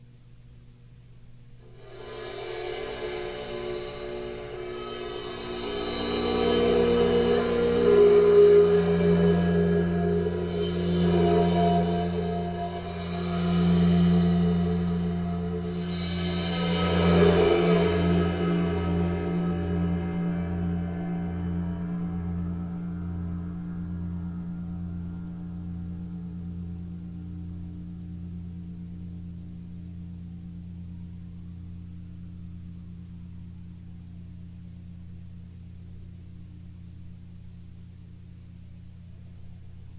Cymbal Swell 106
bowed cymbal swells
Sabian 22" ride
clips are cut from track with no fade-in/out.